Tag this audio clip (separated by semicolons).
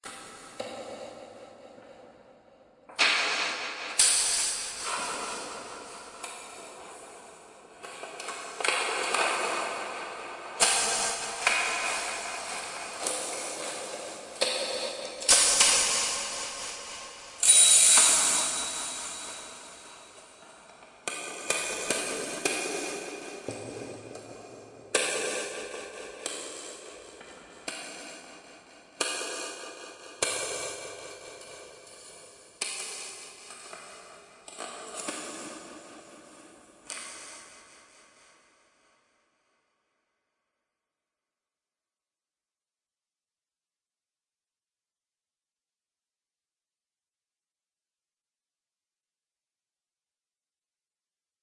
bell; braille-machine; brailler; braille-writer; ding; machine; metal; metal-arm; papar